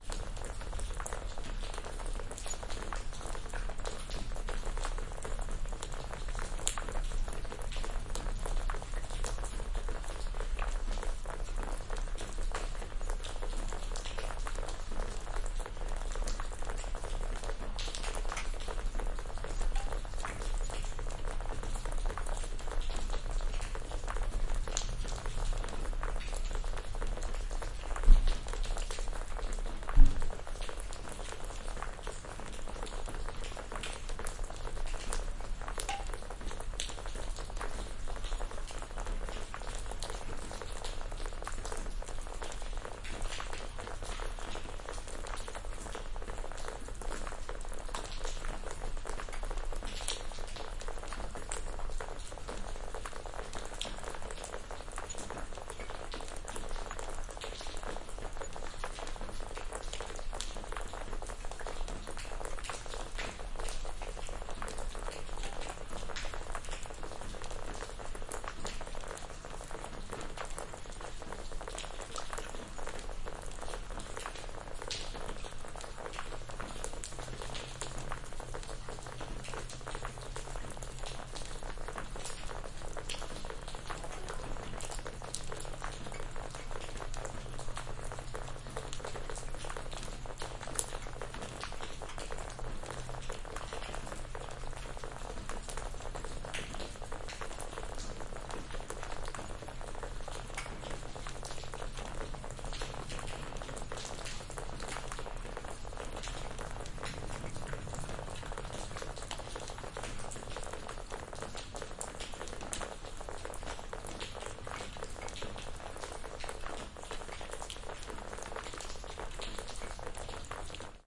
teufelsberg tropfen2
We spent the afternoon at the ruins of the abandoned radarstation on the top of Teufelsberg in Berlin. It had been raining in the morning so inside the whole building there were different kind of drops to record. this is from a different room.
binaural-recording, bladerunner, building, dark, drops, field-recording, industrial, nature, old, rain, sci-fi